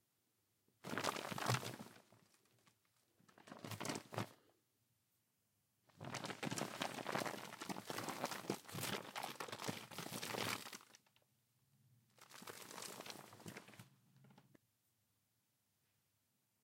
Recording of a heavy, thick plastic bag, recorded for the sound of a protective plastic sheeting being lifted. There are some 'liftings' and also an extended crumpling in there too.
Recorded on an Se X1 Large Condenser mic.